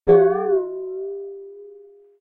Bowl With Water 1
A stereo recording of a stainless steel bowl that has some water inside it struck by hand. Rode Nt 4 > FEL battery pre amp > Zoom H2 line in.